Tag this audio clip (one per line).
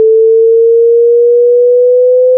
wail
alarm
siren